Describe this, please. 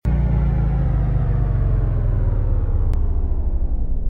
Power down
A powering down sound
off, scifi